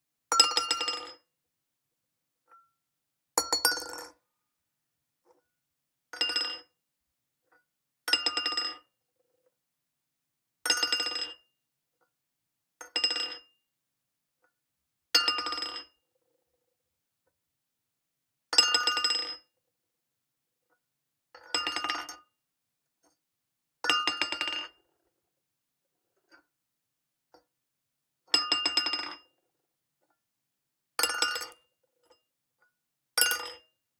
Glass Drop Knock On Table Floor Pack
Shattering, Crash, Knock, Crack, Dinner, Cleaning, Clinking, Wine-Glass, Clink, Breaking, Glass-Jar, Foley, Glass-Cup, Spoon, Kitchen, Fork, Ding, Dong, Broken, Table, Cracking, Jar, Cutlery, Metal, Shatter, Breaking-Glass, Glass, Hit, Glass-Bowel, Knife